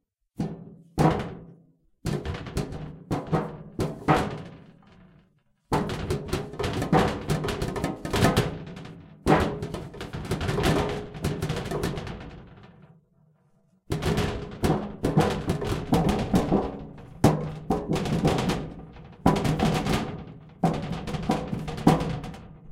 Duct impacts
Shaking and banging a large empty metal box.